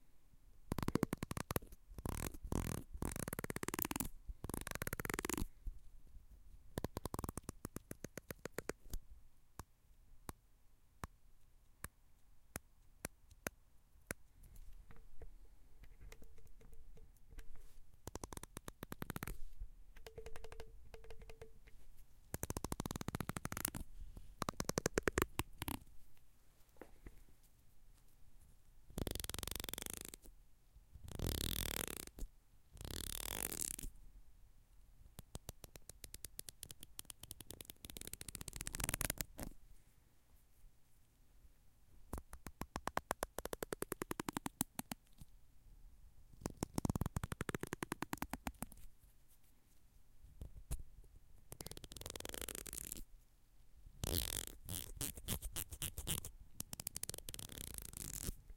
Flicking through comb bristles
comb-bristles, bristles, comb, flick, brush